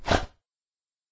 A dog grunt from a labrador retriever